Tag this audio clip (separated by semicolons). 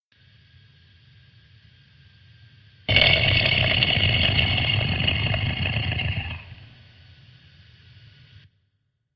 beast; beasts; creature; creatures; creepy; growl; growls; horror; monster; noise; noises; processed; scary